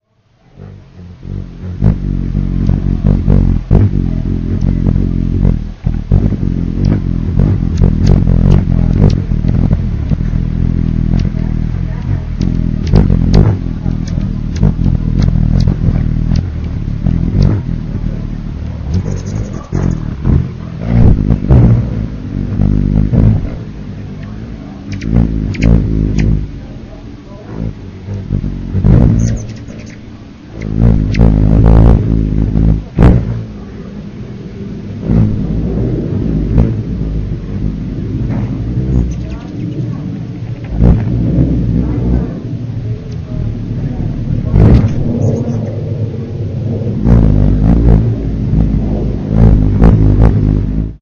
HUMMING BIRDS (7-15-2013)
I recorded this with a Yamaha Pocketrak that I taped to the feeder and enhanced the vol. with Sony Sound Forge. It was fun to watch their reaction to the recorder but they eventually figured out it was harmless. Hunger overcomes fear every time. :O) Here and there you can hear our TV in the background as I forgot to mute it. Thanks. :O)
WINGS, HUMMINGBIRD, CHIRPING